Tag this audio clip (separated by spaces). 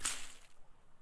natural nature rocks